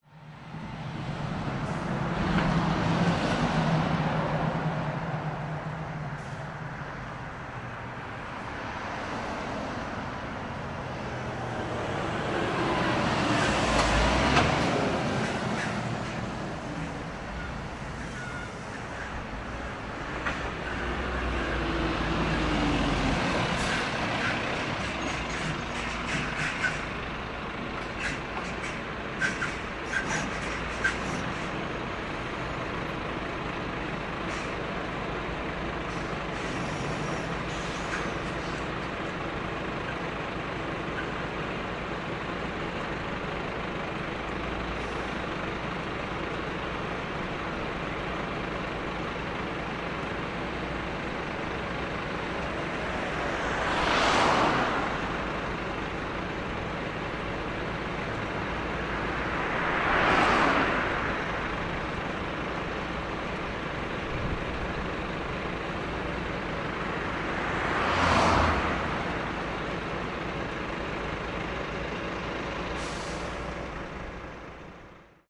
08092014 Torzym truck on 92
Fieldrecording made during field pilot reseach (Moving modernization project conducted in the Department of Ethnology and Cultural Anthropology at Adam Mickiewicz University in Poznan by Agata Stanisz and Waldemar Kuligowski). Sound of passing by trucks along the national road no. 92 (Torzym, Lubusz). Recordist: Robert Rydzewski. Editor: Agata Stanisz. Recorder: Zoom h4n with shotgun.
torzym, truck, fieldrecording, poland, lubusz, traffic, engine, road, noise, street